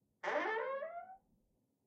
Door Squeak
Door, Squeak, Squeaking, Old, Creak